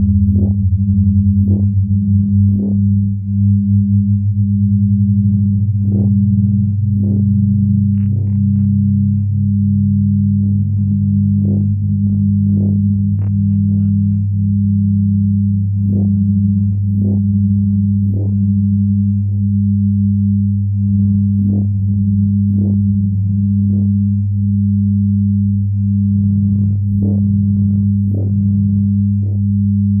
Sci-fi noise
some noise created in Audacity
digital, electro, future